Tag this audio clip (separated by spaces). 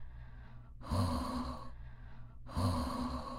exhale breathing inhale breathe